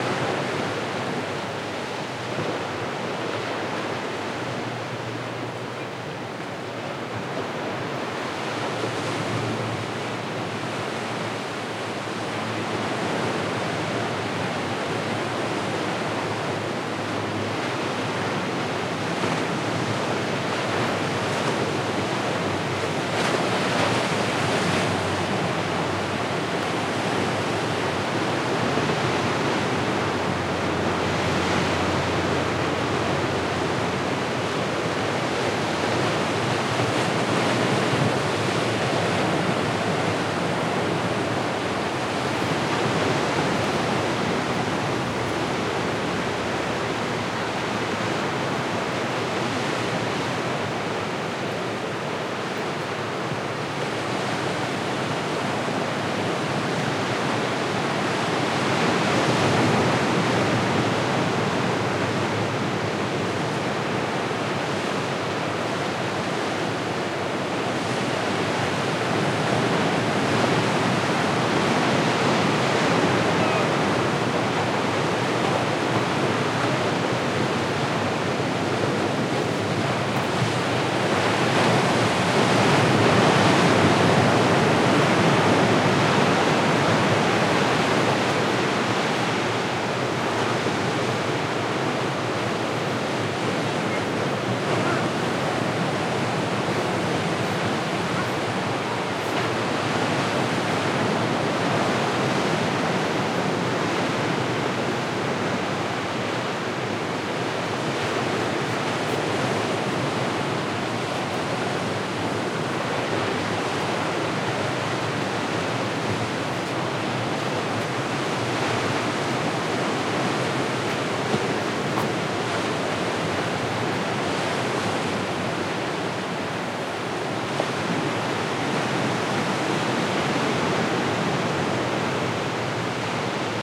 Ocean waves recorded from about 250 feet up. Because of the distance, the sound is as much about white noise as waves.